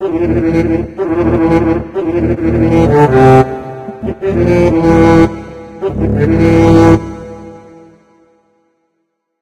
Playing The Double Bass Vibrato Style in FL Studio.
Smooth,Bassy,Soft,Hype,Dreamy